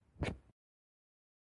Mover El Arma Rapidamente s
Gun, movement, guns